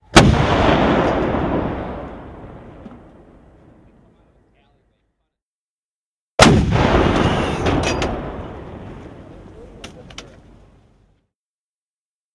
Cannon Shot
Cannon getting shot.
armies, army, artillery, bomb, break, bullet, cannon, destroy, destruction, explosion, explosive, military, missile, shooter, shot, target, warfare, weapon